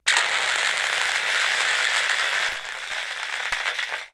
spontainious combustion
recordings of a grand piano, undergoing abuse with dry ice on the strings
abuse, dry, ice, piano, scratch, screech, torture